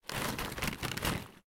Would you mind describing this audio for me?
clean audio recorded in room ambience